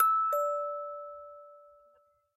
clean mi re
eliasheunincks musicbox-samplepack, i just cleaned it. sounds less organic now.
sample, note, metal, clean, toy, musicbox